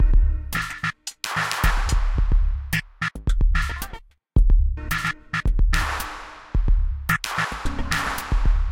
TechOddLoop4 LC 110bpm
Odd Techno Loop
loop, odd, techno